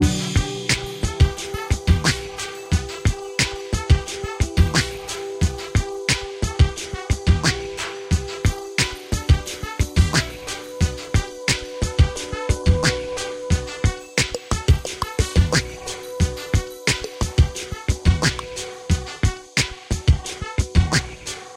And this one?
Loop DreamWorld TheCircus 02
A music loop to be used in storydriven and reflective games with puzzle and philosophical elements.